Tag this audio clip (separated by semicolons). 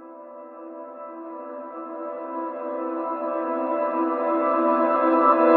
mellow; chillout; new-age; piano